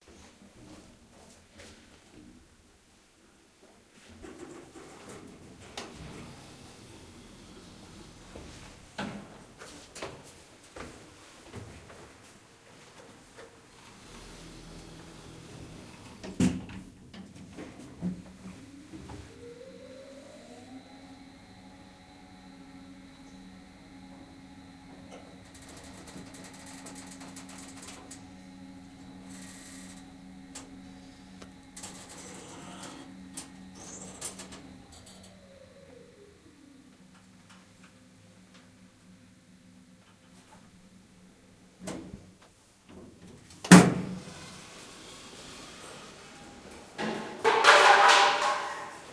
Lift opening and closing
An elevator opening and closing on a landing with reflective surfaces. Then closes and moves to another floor
elevator, hydraulics, open, doors, lift